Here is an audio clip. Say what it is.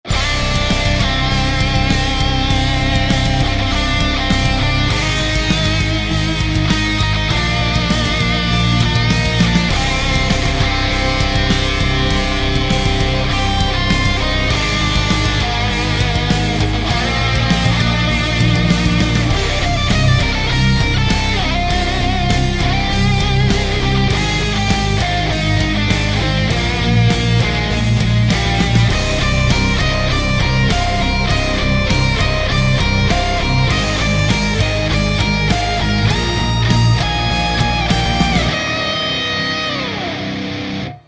Sad Metal Solo 2
Recorded another guitar solo.